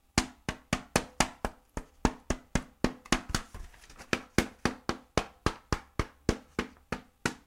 pen hitting paper
hitting, paper, pen